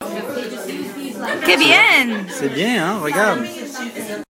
I was having a last night dinner in Montréal Québec when Renaud showed me something about my new Iphone.
al, dinner, Iphone, night, Qu, Renaud